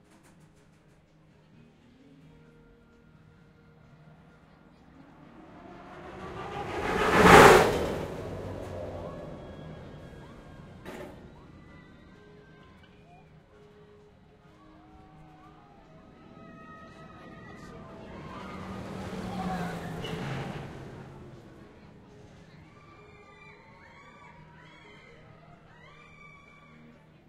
The sound of a roller coaster launch.
Achterbahn, amusement, atmosphere, coaster, environment, park, roller, themepark, thrill